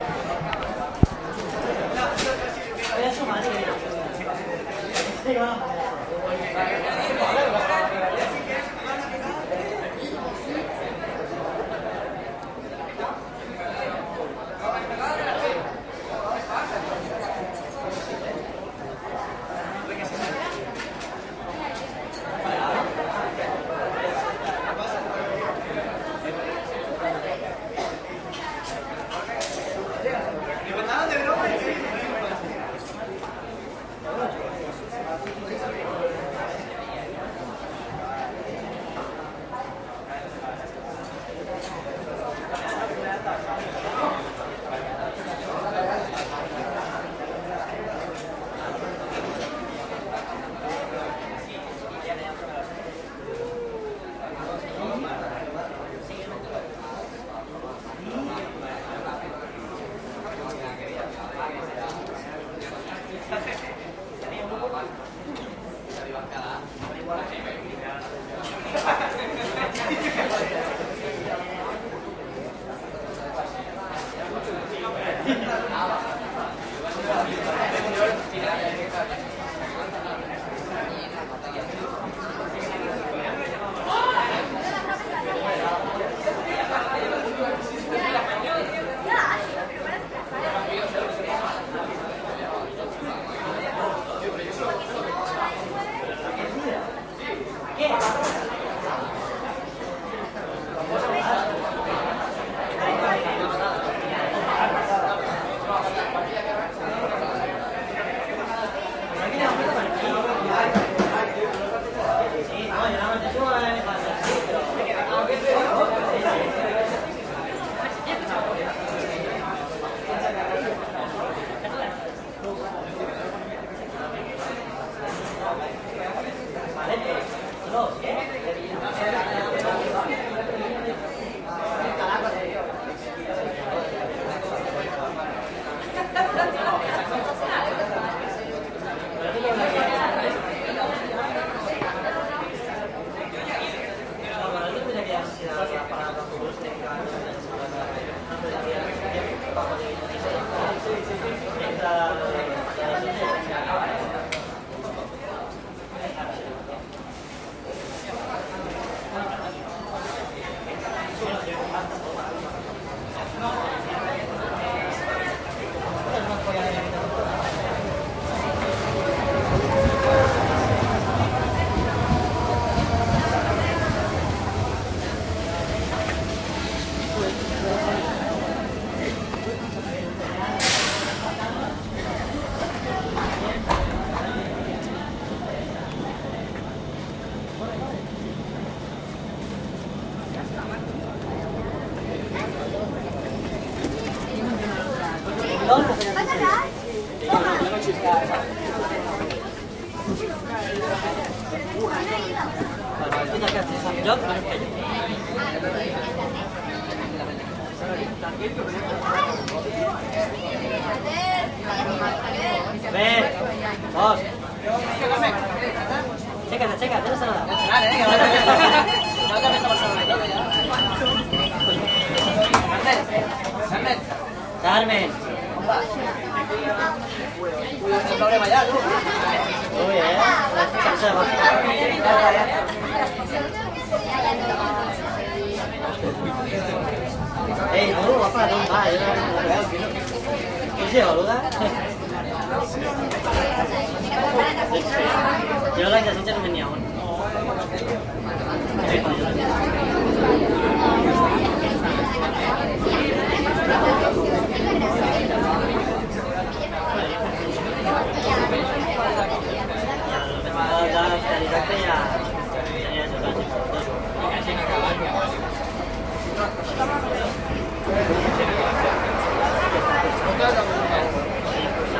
people waiting for the train and taking it
People waiting on Sitges trains station for a train to Barcelona. Eventually, they get on the train. The recording goes on a little more.
crowd, jabbering, people, station, train